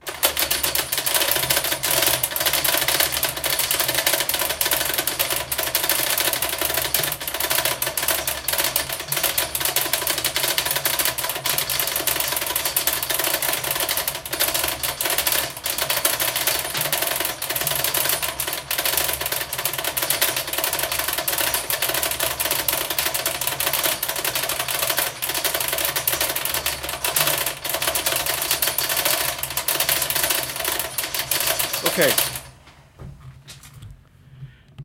In Man of La Mancha a moving stairway descends to the stage. I made a loop of chain hung over a catwalk railing, and running over a peace of conduit. I recorded the sound as a stage hand ran the chain over the rail. Chains Reversed is the reversed version. I played both versions at the same time and stopped it with the Stairway down sound.
Catwalk, ManOfLaMancha, Moving, Stairway